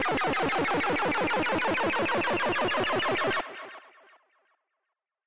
Synth chiptune 8 bit pitch up rise build up
pitch, 8, Synth, chiptune, rise, bit, build, up